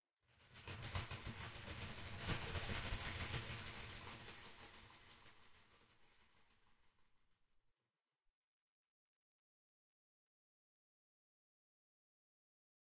37 hn birdstakeflight
Birds taking flight. Made with coffee filters.